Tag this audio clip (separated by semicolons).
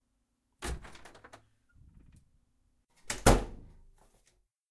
wooden; door; close; opening; doors; wood; closing; open